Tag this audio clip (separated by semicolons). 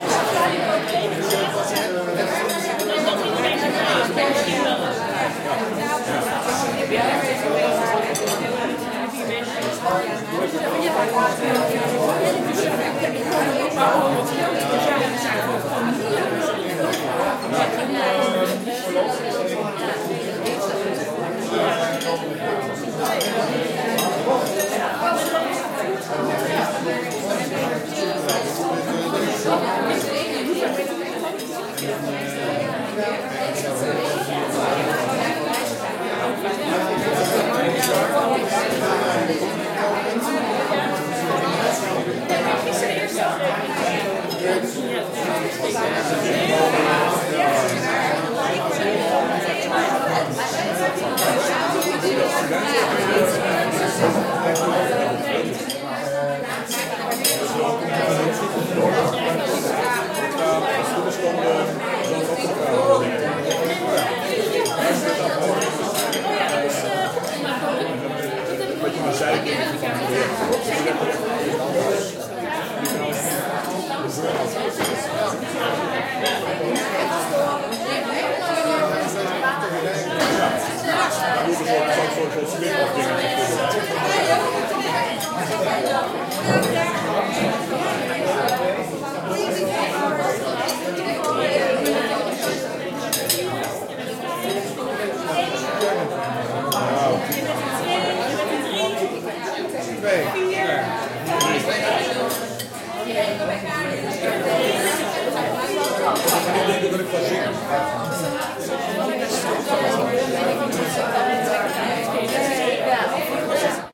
ambiance; ambience; ambient; atmos; atmosphere; background; background-sound; dinner; dutch; field-recording; general-noise; holland; inside; internal; kitchenware; netherlands; people; soundscape; table; talking; walla